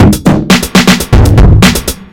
Bruem sink1(dance)C
Another version of my break loop.
loop, fast, drums, break